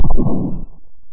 explosion asteroid2
An explosion sounds for a retro style asteroid game...maybe. Who knows what I was doing.
8-bit, arcade, chippy, lo-fi, retro, video-game